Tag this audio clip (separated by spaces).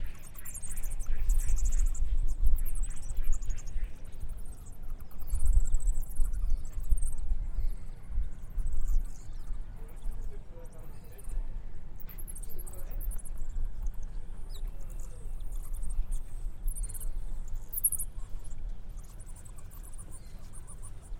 Asia Bats East Nature South